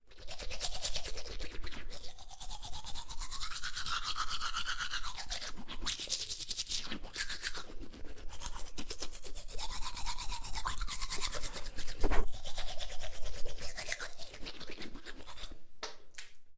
tandenpoetsen-def01
woman brushing teeth in the bathroom. double mono. recorded on MD with rode nt3
brushing, teeth, brushing-teeth